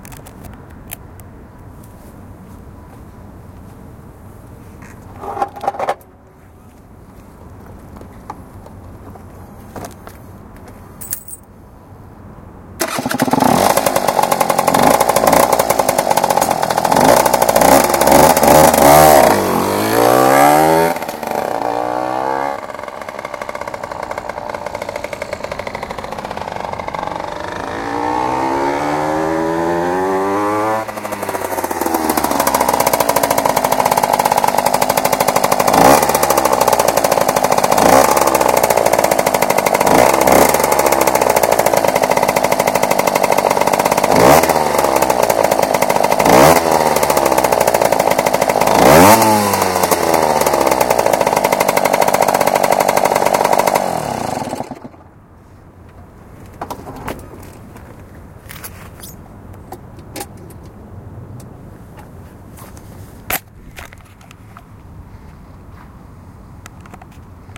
moped-start-go-return-stop
Derbi GPR 50 Racing w/ Metrakit Pro Race Kit.
Keys-start-engine sound-gas a little- drive off- turning 40 meters away- driving back- returning-more gas- engine purr- more gas- keys- stop